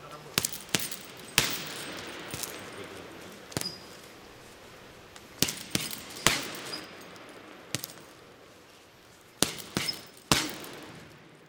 Professional boxer hits punching bag while training routine, his trainer gives some comments in Russian language. Huge reverberant gym.